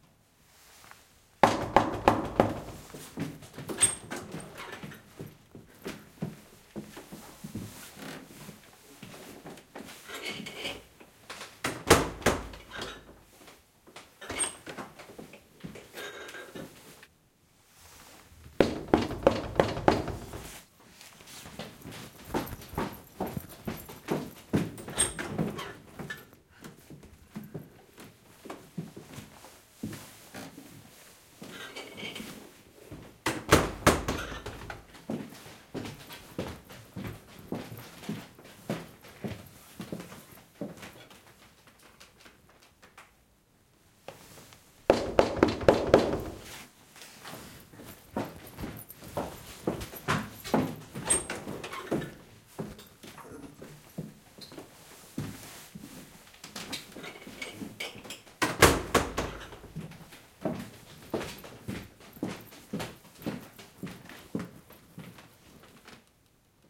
Someone knocks on the door. Someone else arrives to open the door. The two characters go inside after the door closes.
Knoc knoc -> Footstep -> Dorr opening -> Footsteps -> Door closing -> Footsteps
Ch1 : Boom (outside the apartment, on the staircase)
Ch2 : Character 1 (inside the apartment, who open and close the door)
Ch3 : Character 2 (on the staircase, who knoc the door and enter)
The sequence is repeated 3 times.